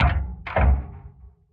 Low-frequency bumps against metal.
It could be used for someone or something bumping into a metal surface.
Low-frequency metallic thud and rumble; mid-frequency and high-frequency metallic hit.
Designed sound effect.
Recording made with a contact microphone.